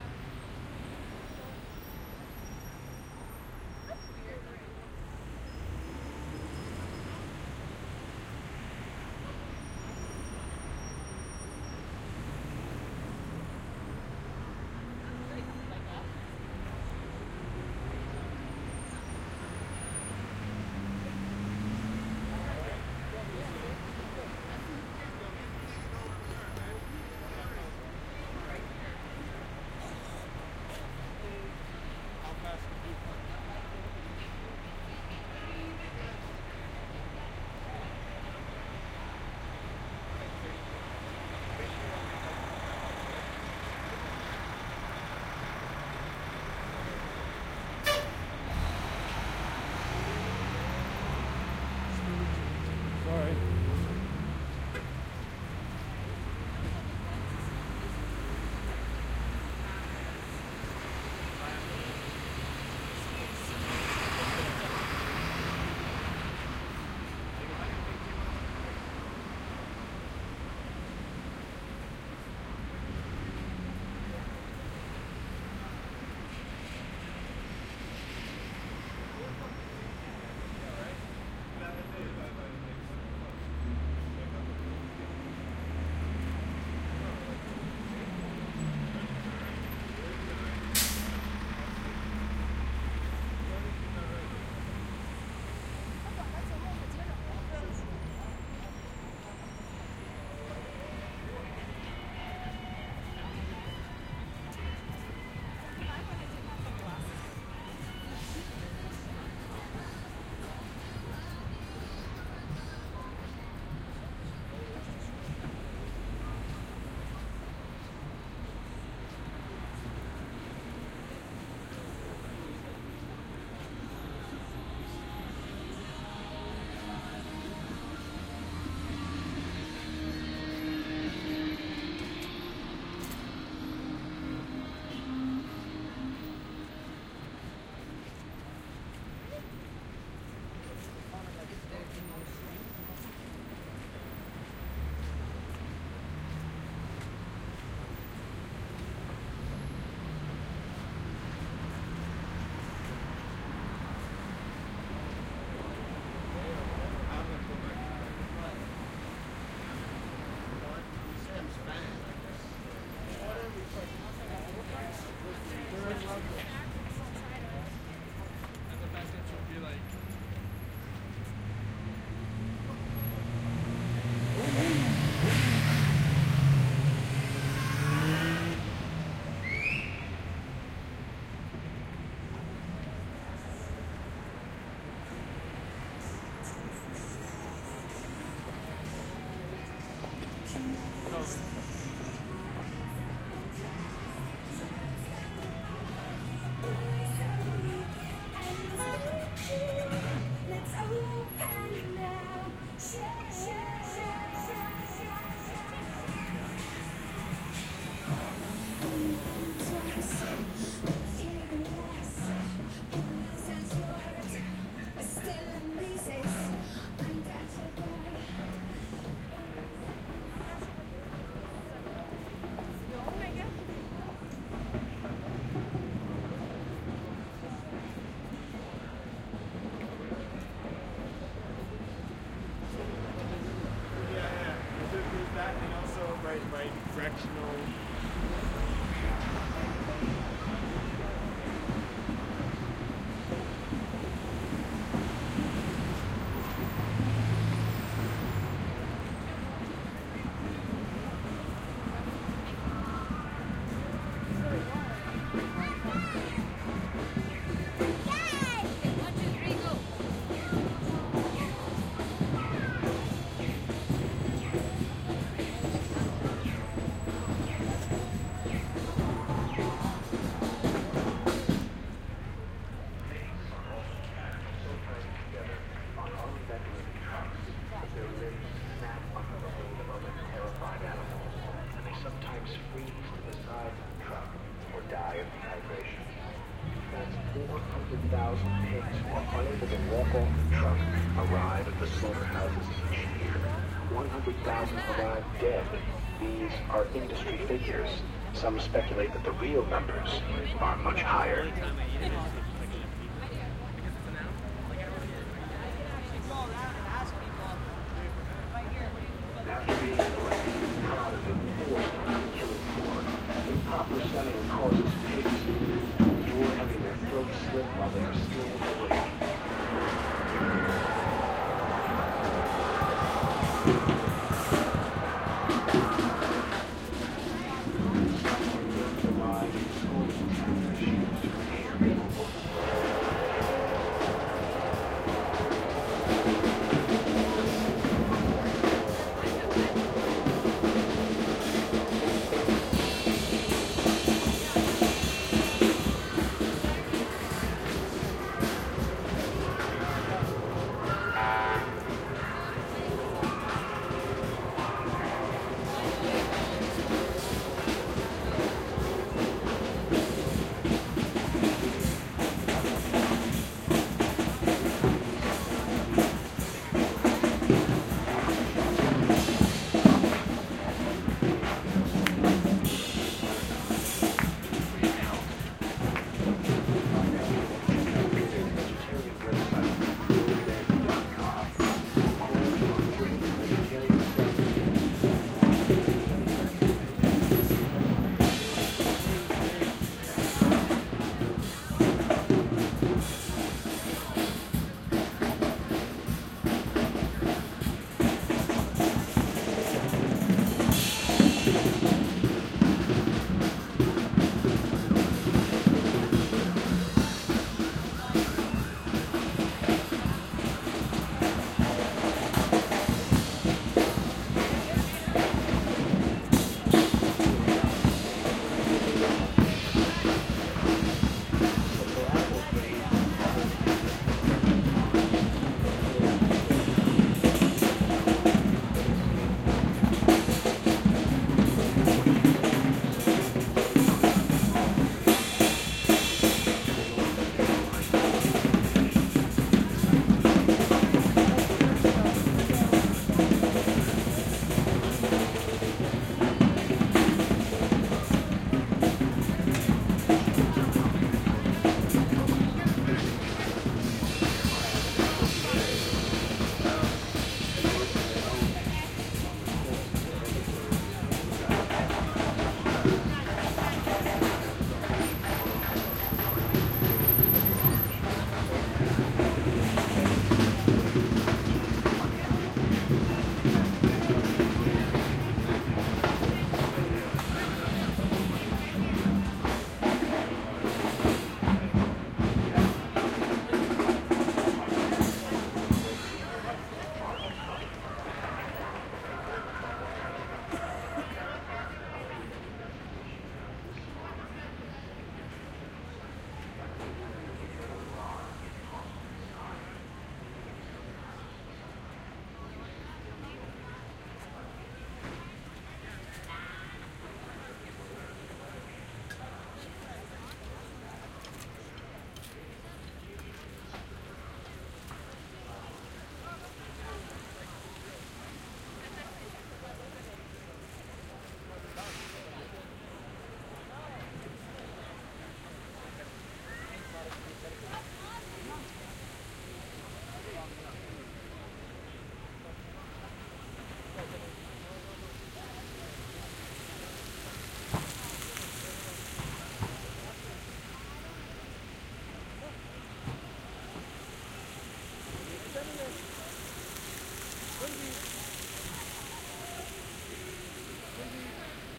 yonge street 02
Walking South on Yonge Street in Toronto. This is a popular shopping area and despite being late at night it was rather busy. This is a continuation of "yonge_street_01". At 5:10 I stop across the street from the drummers again, next to some protesters (whom I joined with after this recording). Recorded with Sound Professional in-ear binaural mics into Zoom H4.
traffic, people, field-recording, canada, city, environmental-sounds-research, toronto, noise, phonography, binaural, street, crowd, outside